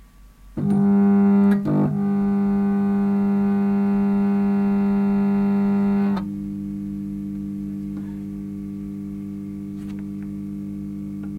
lamp2 amplified
Fluorescent lamp starts with a ballast making 50 Hz noise with different sounds
50hz, fluorescent-lamp, lamp, noise